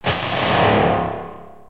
Some Djembe samples distorted